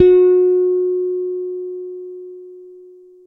TUNE electric bass
bass, note, pcm